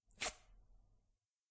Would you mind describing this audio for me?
Fast Paper Ripping 5
Fast ripping sound of some paper.